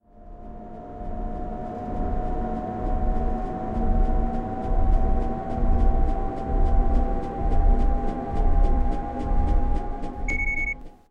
Cannon Charge MAC
Made in Audacity with a couple of stock sounds. Can be used for a big industrial machine or a huge mechanical rocket cannon.
Beeps at the end were taken from
lift, metal, cannon, bang, industrial, mechanical, artillery, Charge